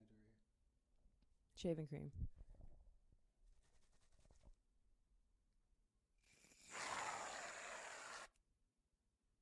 squirting shaving cream
cream, foam, shaving